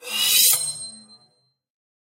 Sword Drawing 1
Sword drawing sound (medium length) with noise reduction, little bit of eq and compressor. Recorded in a construction site with portable sony digital recorder in Burnaby, BC, Canada.
I hope my sound is useful to your project!
draw, medium, metal, sword